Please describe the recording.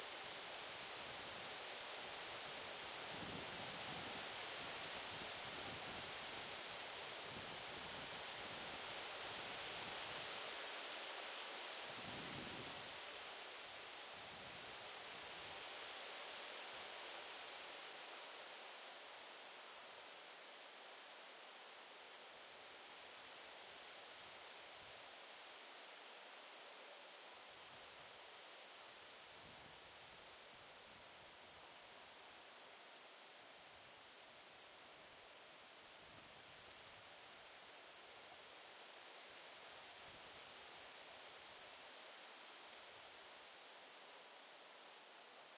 noise rye
noise, quiet